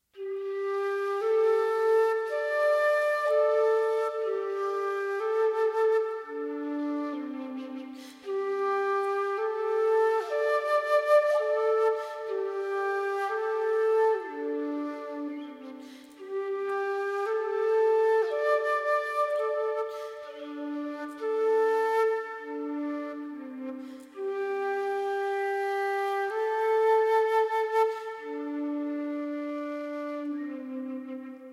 A flute clip from a song I discarded.
Played on my Yamaha flute, recorded with C3 Behringer mic, on UA4FX recording interface, with Sonar LE
Reverb and cropping done using fl studio
ambient, flute